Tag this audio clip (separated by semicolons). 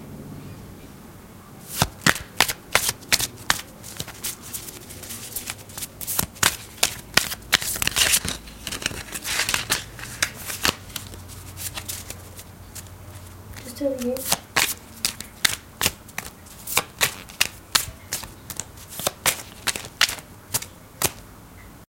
Cards; OWI; Shuffling